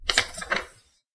flip page
paper, book, manual, toss, flip, script, page